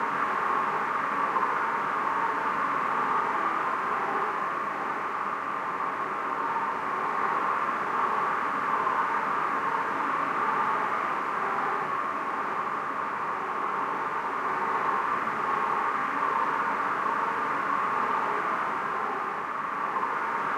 Cold Howling Wind/Breeze (Loopable)
I synthesized it using FL Studio.
This sound is loopable.
ambiance, ambience, ambient, artic, atmo, atmosphere, breeze, cold, fl-studio, loopable, nature, synthesized, weather, winter